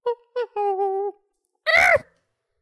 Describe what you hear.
Monkey noise (imitation) 2
Needed some cheeky monkey noise for an infographic I made and just randomly mumbled into a mic. (Genesis Radium 400)
ape, chimp, chimpanzee, monkey